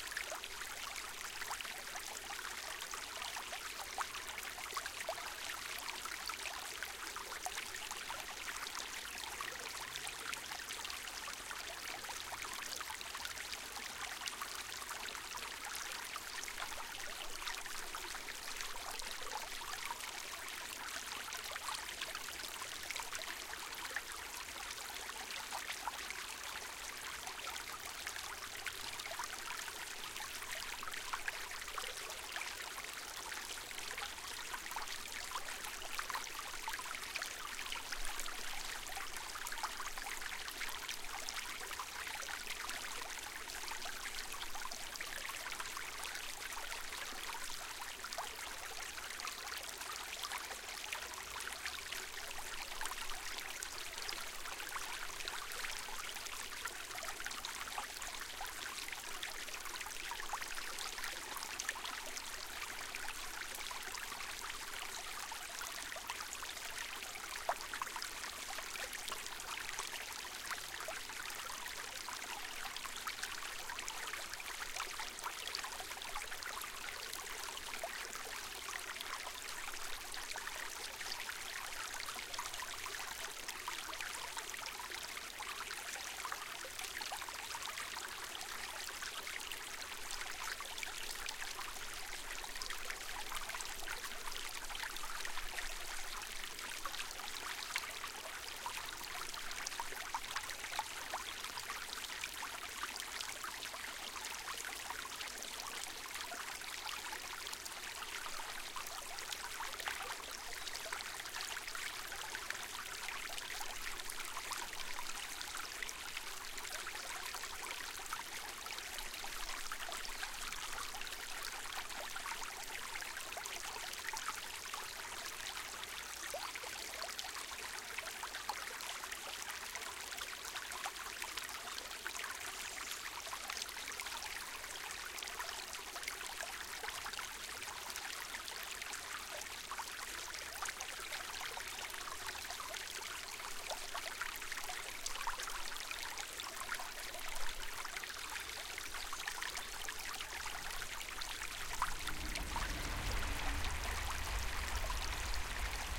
small meandering stream in the woods.
recorded with couple of Rode NT5